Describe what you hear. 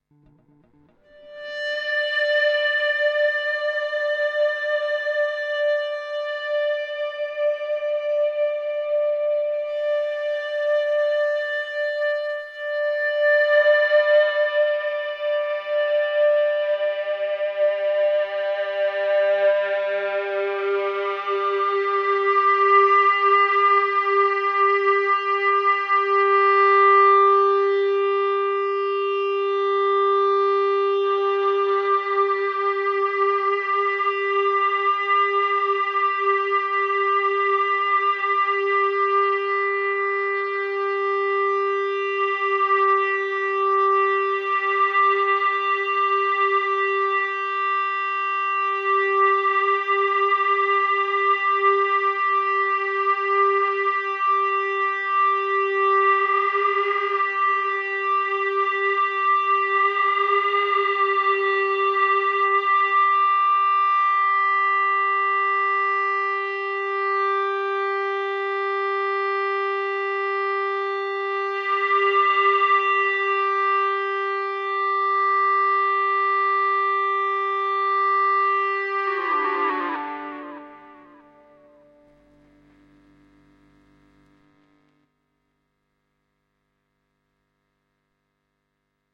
EBow guitar drone - G3
An EBow-ed guitar drone in the key of G minor
In this instance the note sustained is G3
Performed with an EBow on a Gibson SG with P90 pickups
Can be layered with the other drones in this pack for a piece of music in Gm
drone, EBow, G-minor, guitar, sustained-note